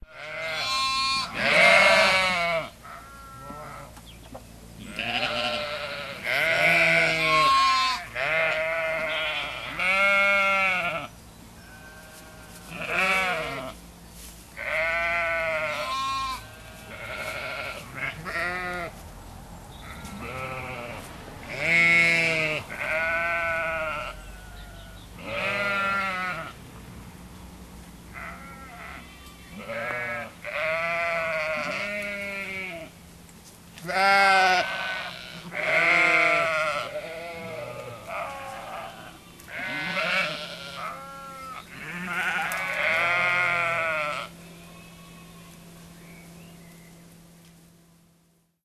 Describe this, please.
I was wearing a flat cap so the sheep thought I was the farmer and raced down the hill towards me, expecting to be fed. They were disappointed. It was early May and the air was still and clear. You can make out the echoes from the steep hill behind them.